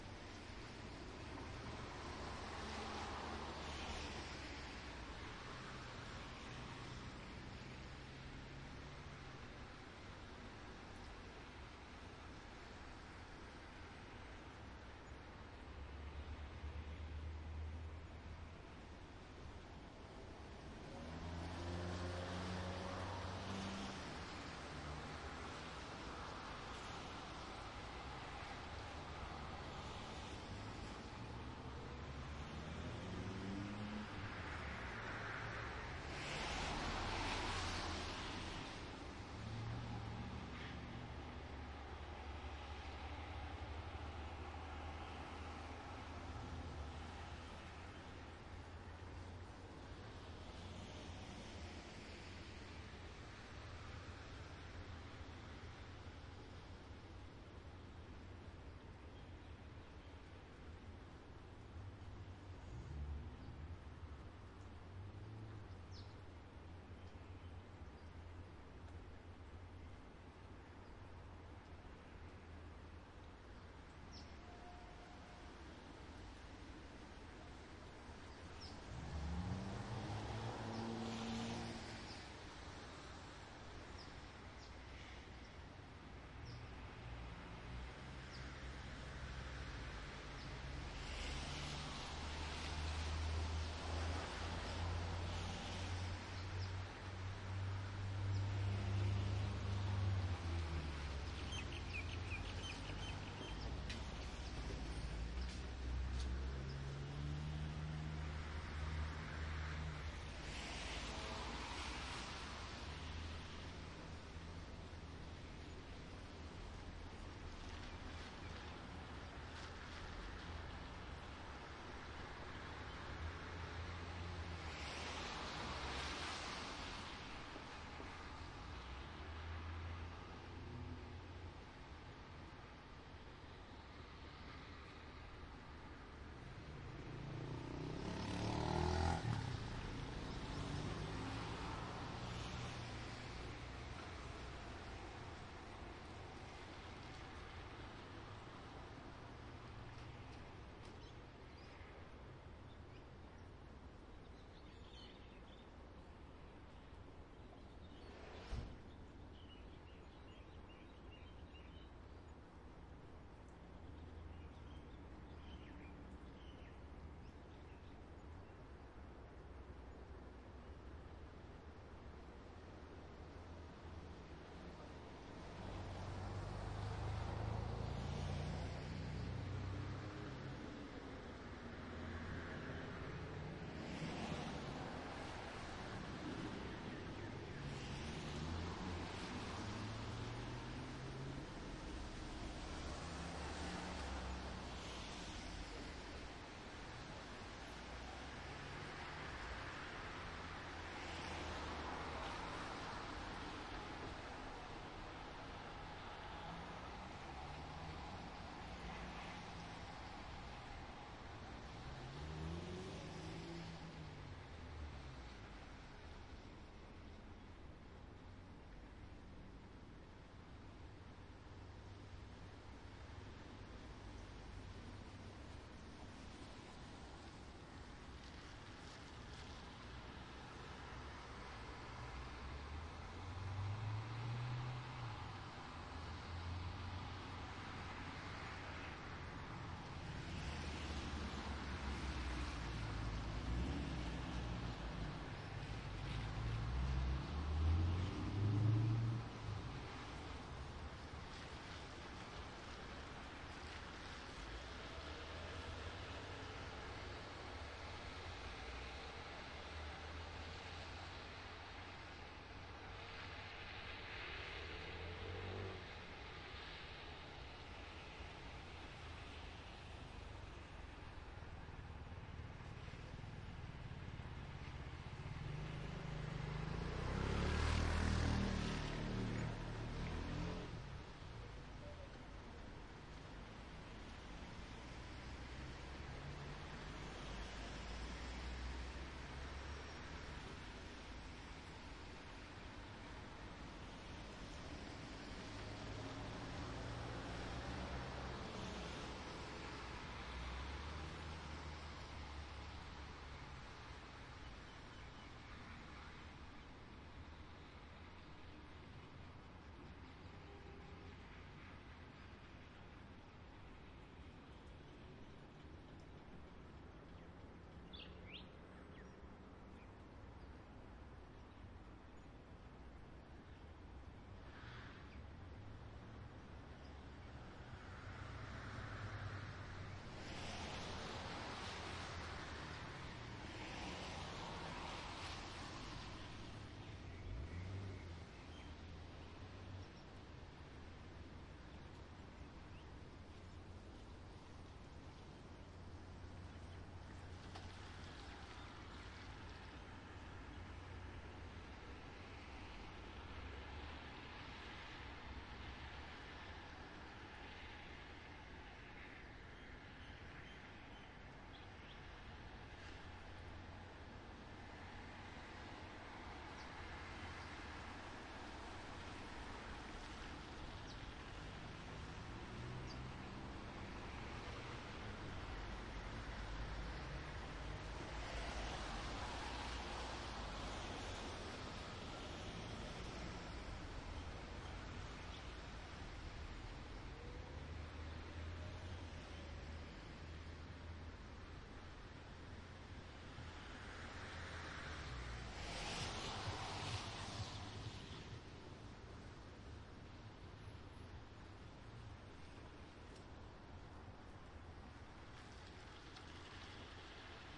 wet traffic ortf
This is a recording I made at the front of my house after a storm. I used a pair of akg c1000's going in to my mixpre6 in ortf configuration about 15 metres from the sound source. Recorded in suburban Melbourne Australia